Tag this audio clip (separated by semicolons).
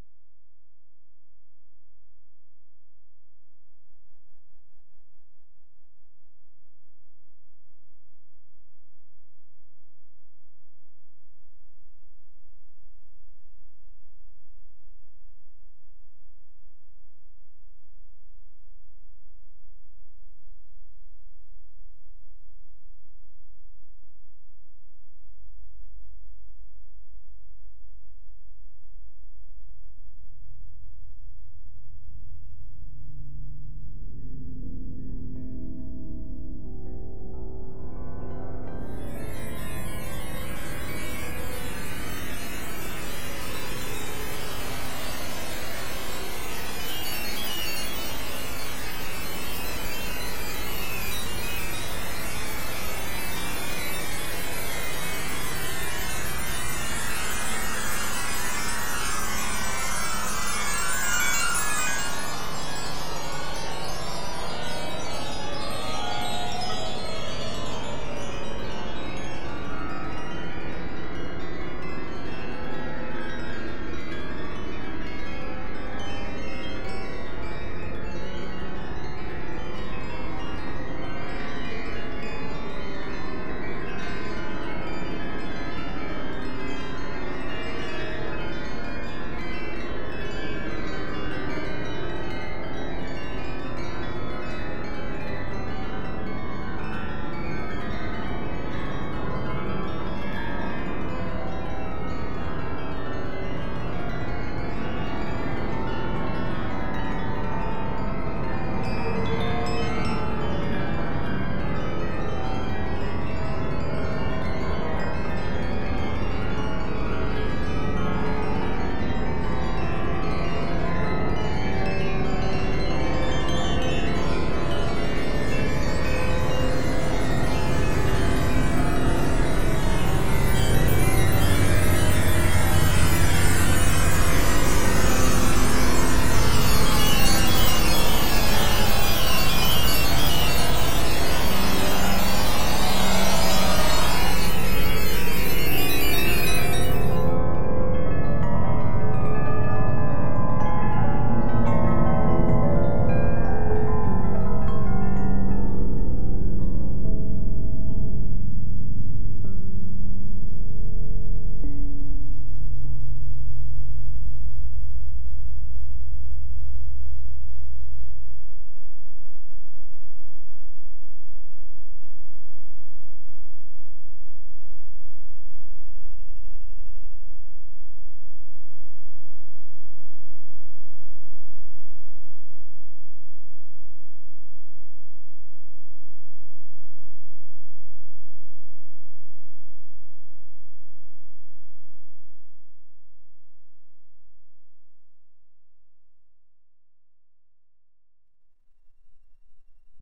image-to-sound; terirsters; shimmer; random; rhodes; samples; sparkle; electric-piano; corsica-s